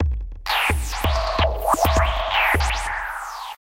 Drumloops with heavy effects on it, somewhat IDMish. 130 BPM, but also sounds good played in other speeds. Slicing in ReCycle or some other slicer can also give interesting results.
beats, drumloops, effects, glitch, idm, processed